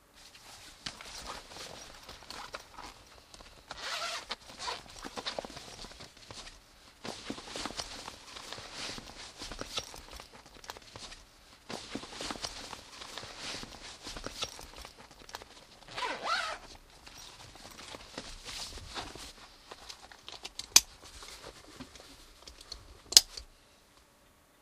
Packing a pillow in a backpack
Packing, Pillow, Backpack